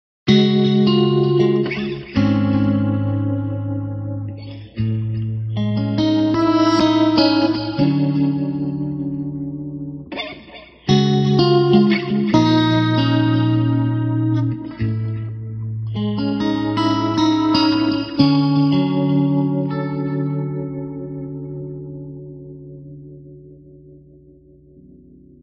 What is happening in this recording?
another chordal meander
Some interesting chords with some airy reverb and chorus
ambient, chords, guitar